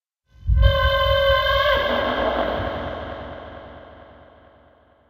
cry M98aL2-16b
This sound bases on the awful noise a rubber balloon can make if you slowly let escape the air from the balloon. I recorded the sound, stretched the frequency and processed it with some filters.